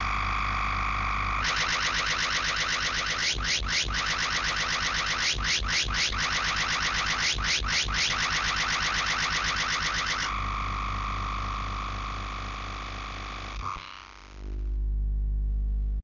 circuit bent keyboard